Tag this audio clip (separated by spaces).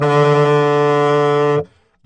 sampled-instruments saxophone tenor-sax jazz woodwind sax vst